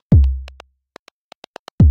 Rhythmmakerloop 125 bpm-42
This is a pure electro drumloop at 125 bpm
and 1 measure 4/4 long. A variation of loop 37 with the same name. An
electronic kick drum and an electronic side stick. It is part of the
"Rhythmmaker pack 125 bpm" sample pack and was created using the Rhythmmaker ensemble within Native Instruments Reaktor. Mastering (EQ, Stereo Enhancer, Multi-Band expand/compress/limit, dither, fades at start and/or end) done within Wavelab.
125-bpm, drumloop, electro